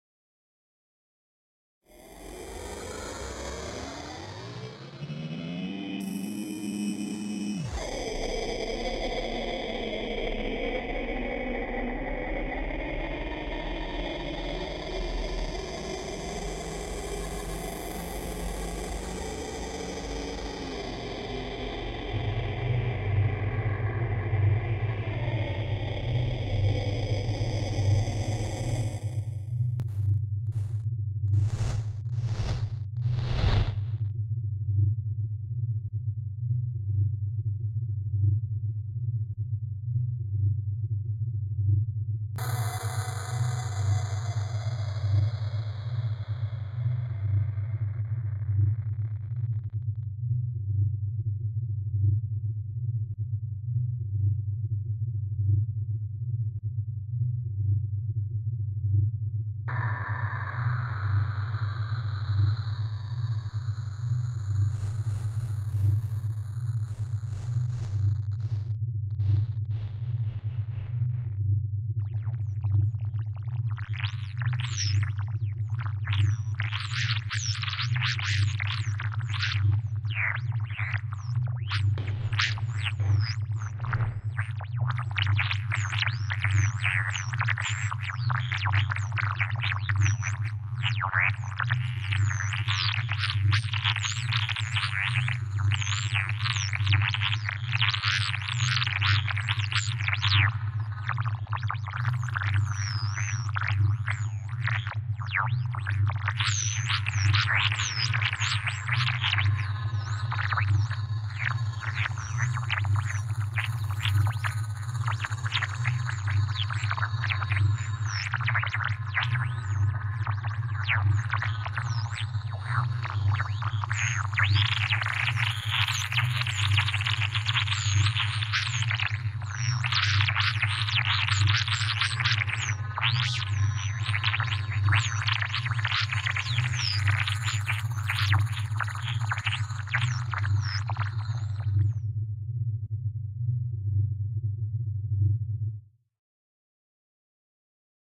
Abstract sounds created by Doug Nottingham for Richard Lerman's Sections realization using Apple Logic, Moog Voyager and .com synthesizers.